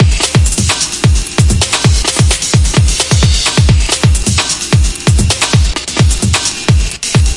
Drum Beat 3 - 130bpm
Groove assembled from various sources and processed using Ableton.
drums, percussion-loop, drum-loop, groovy